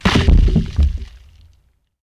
A sound I created for TV commercials having Price Crashes. It's the sound of a granite boulder hitting another one, and splitting, then slowed down.